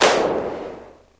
Classic TR 909 Clap JdXi 4
TR-909 Drum Hits All Sampled From My Roland JdXi Synth
Synth-Bass, Funk-Bass, 808, Bass-Groove, 606, Bass-Sample, Compressor, Bass-Samples, 909, 707, Fender-PBass, TR-909, Soul, Groove, Drum-Hits, Funky-Bass-Loop, Fender-Jazz-Bass, Beat, jdxi, Bass-Loop, Synth-Loop, Bass, Loop-Bass, Jazz-Bass, Bass-Recording, Funk